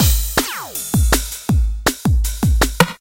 Drums loop 160BPM-01
160bpm, drums